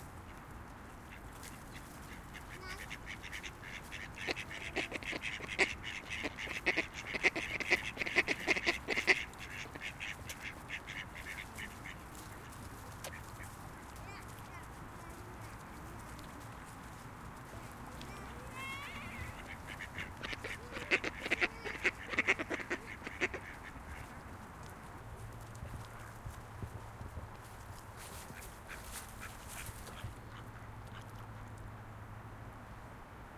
Some ducks on the pond at my local park.
Recorded with Zoom F1.